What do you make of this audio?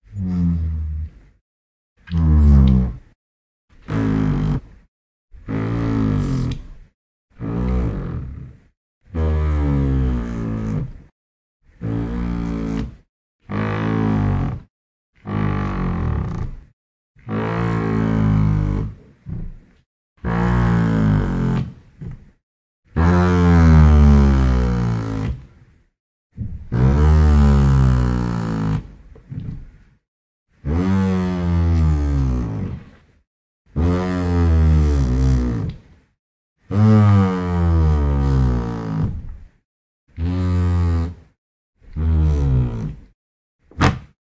20180816 juice pack bass rumble groan straw plastic
A peculiar local brand of fruit juice sold in small plastic bags with a straw will sometimes vibrate violently when sipped, producing this deep groaning sound.
I've yet to discover what exactly causes this to happen. When trying to record it I experimented with straw positioning, sipping strength, holding angle of the pack, and couldn't get it to consistently rumble. This may be a marketing conspiracy to get audio people to stock up on juice to master how to perform the packaging.
Recorded with Sony PCM-D100, edited and denoised in iZotope RX 7.
bass, deep, low, rumble